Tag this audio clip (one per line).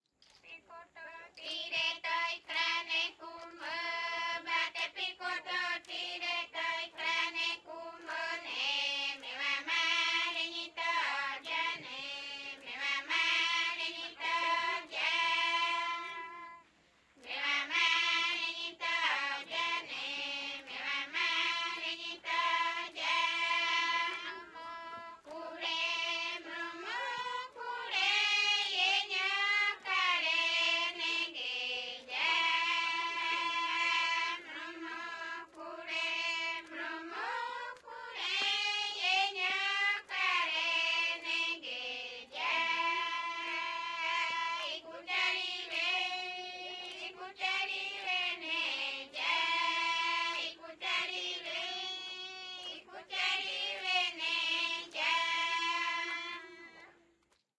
amazon
brasil
brazil
caiapo
chant
female-voices
field-recording
indian
indio
kayapo
music
native-indian
rainforest
ritual
tribal
tribe
tribo
voice